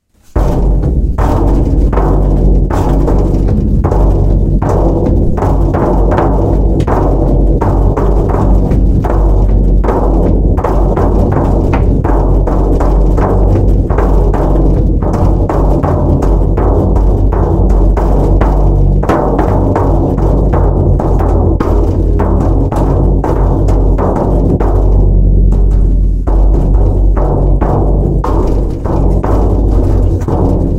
ZZ11DRUM
Don't know if I have a trouble. Long since I used this aPP. Again, this drum mesures only 400 by 35 mm. Nice sound for its dimension, ain't it. Have to invent my own 'instruments' because sound 'studio' gotto share in my bedroom (that does not look like a bedroom any more. If yuo have already downloaded a file from me, skip the first which was wrong. Thanks and enjoy.